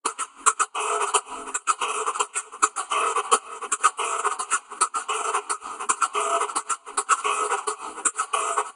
Guiro Rhythm Loop Remix
An instrument named Guiro on a Cuban rhythm mixed with different effect.
This sound come from a rhythm played by a percussionist (not a drum-machine pattern).
guiro, percussion, salsa